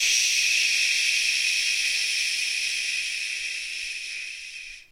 Me making a "pshhhhhhhhhhhhhhhhhhhhhhh" sound to simulate a gas leak.
gas, human, leak, leaking, male, psh, vocal, voice